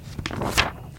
Book Turn Page 2
Variation of turning a page of a book
book, turning, turn, page